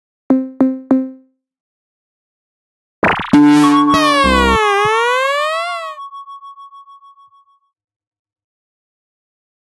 20140316 attackloop 120BPM 4 4 Analog 1 Kit ConstructionKit WeirdEffectsStepFiltered4
This loop is an element form the mixdown sample proposals 20140316_attackloop_120BPM_4/4_Analog_1_Kit_ConstructionKit_mixdown1 and 20140316_attackloop_120BPM_4/4_Analog_1_Kit_ConstructionKit_mixdown2. It is a weird electronid effects loog which was created with the Waldorf Attack VST Drum Synth. The kit used was Analog 1 Kit and the loop was created using Cubase 7.5. Various processing tools were used to create some variations as walle as mastering using iZotope Ozone 5.
weird, ConstructionKit, electro, sci-fi, rhythmic, electronic